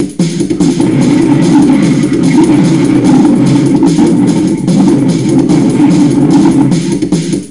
Worst Music Ever
A friend of mine messing around with a drumcomputer and pitch shifter.